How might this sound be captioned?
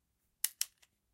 Gun cocking 4
Cocking a revolver. recorded with a Roland R-05
cock, cocking, gun, revolver